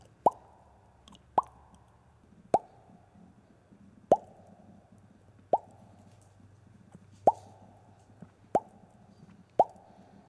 Bubble bursting, popping
Recorded using a StudioProjects B1, w/ a healthy dose of reverb.
Intended to sound like a bubble popping, or a single water drop landing in water.